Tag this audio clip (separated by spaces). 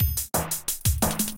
breakbeat dnb drum-loop drums jungle